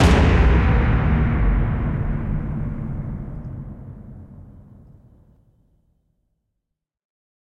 IMPACT DOWNER
sweep, epic, cinematic, climax, trailer, downer, transition, down, impact